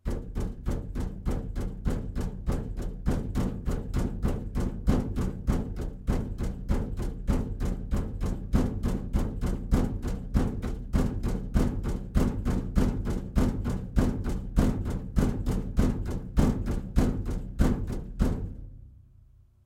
Rhythmic beating on the outside of a washing machine which is a great way to sound like a mechanical device is malfunctioning. Lots of samples in this set with different rhythms, intensities, and speeds. This is a washing machine that is rocking so badly that the transmission is throttling the speed (if it were a top-loader).
Recorded on a Yeti Blue microphone against a Frigidaire Affinity front-loading washing machine.